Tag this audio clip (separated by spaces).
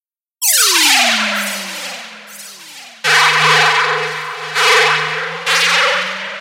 pad tech digital